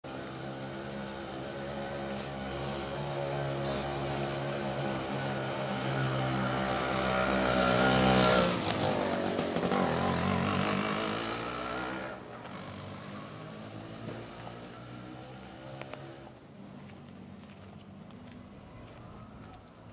Peru mototaxi 2
A motored bike passing by in La Balanza, Lima, Perú. Recorder with a NTG-2 Rode Microphone along with a TASCAM DR 100 Mkii
Comas, feild-recording, Rode, motor-bike, market, taxi, NTG-2, fruit, TASCAM-DR, outdoor, people, barrio, 100-Mkii, bike, Per, Lima